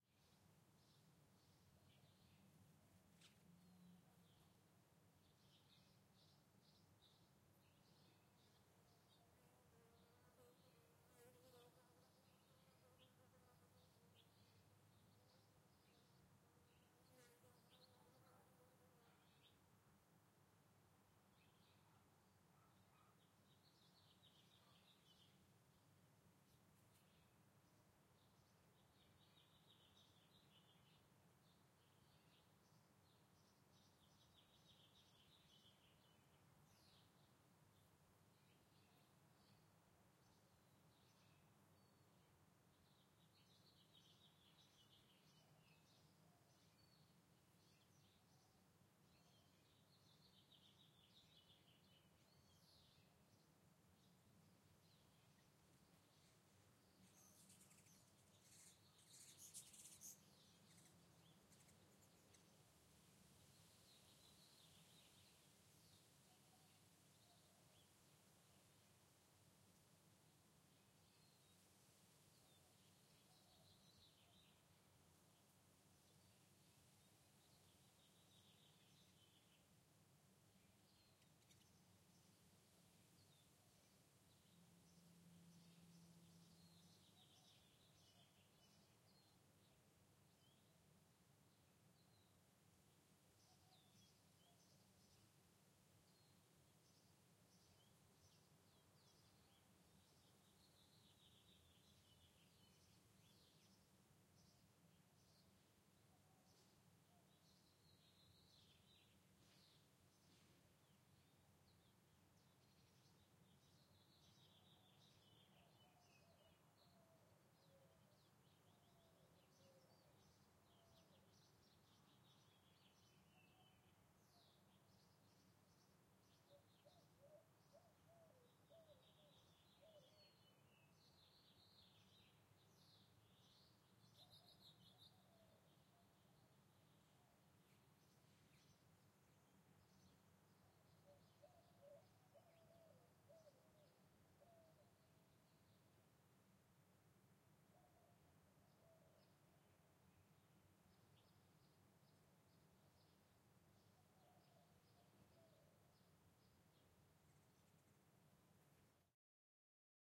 Albuquerque, New Mexico residential daytime ambience. Wind in trees. Bug-bys. Birds
Ambisonic four channel b-format recording recorded with the Zoom H2N in Albuquerque, New Mexico. *NOTE: Recorded with Zoom H2N ambisonic b-format (no z track), Airplane cabin with passenger chatter. *NOTE: you will need to decode this b-format ambisonic file with a plug-in such as the SurroundZone2 which allows you to decode the file to a surround, stereo, or mono format. Also note that these are FuMa b-format files converted from AmbiX format.